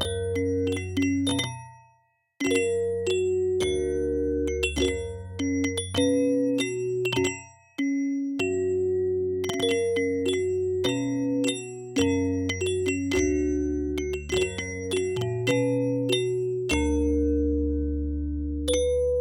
Bells pop (F#major-100bmp)
100bpm, bells, keyboard, keys, loop, loopable